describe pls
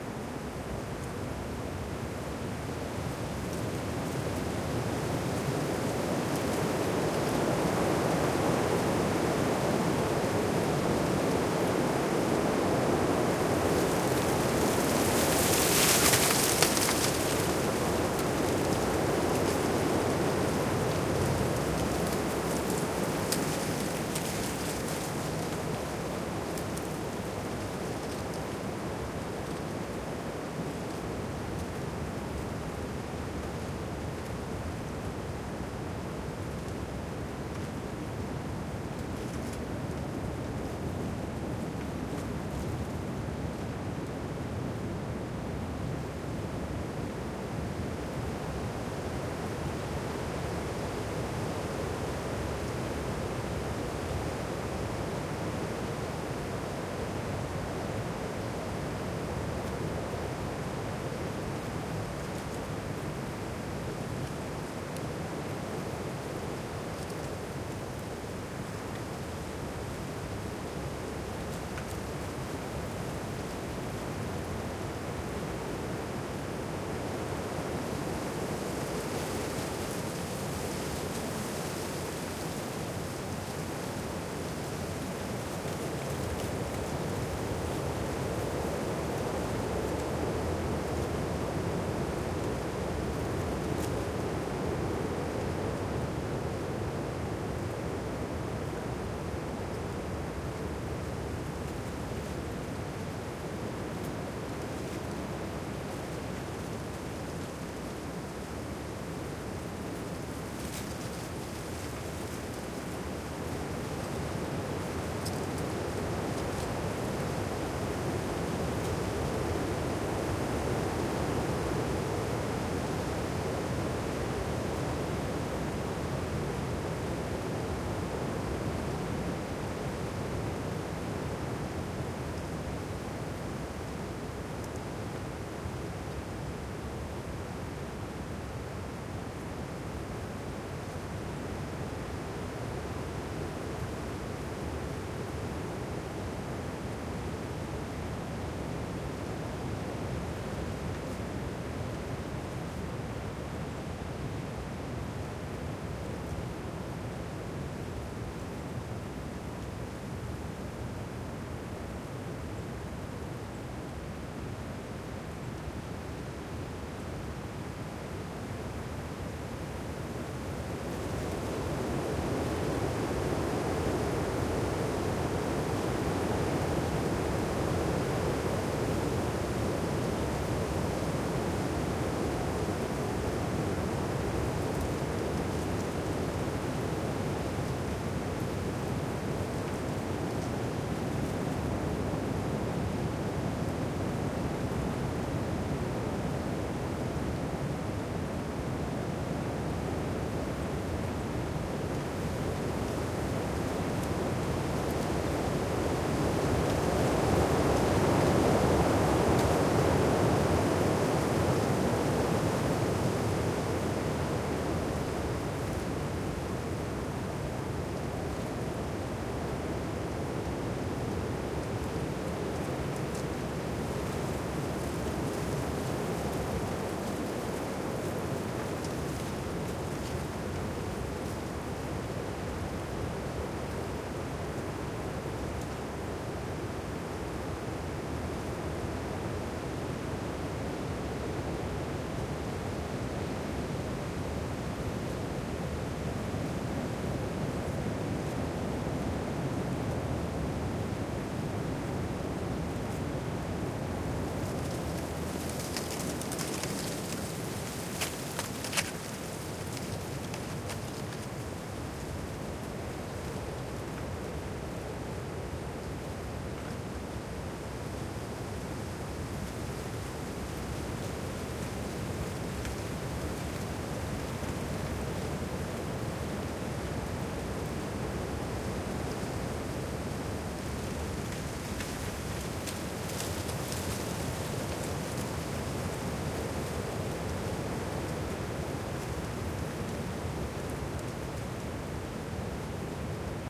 Wind gusts in city park
Winter winds gust through a city park along with occasional bird song and leaf rustle.
Recorded with an ORTF setup Neumann KM184 pair and a Sound Devices MixPre-6 II